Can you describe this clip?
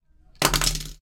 31-Objetos 7-consolidated
objects on table
move, objects, table